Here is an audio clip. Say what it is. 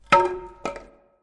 Wood Fall
Me dropping a wooden batten on my driveway at various heights. I did it around 21:30 so there would be no traffic or bird noises etc. Nice clean sound.
If not, that's fine 😊
The more the merrier. Thanks
drop, dropping, fall, falling, floor, hit, impact, plank, planks, wood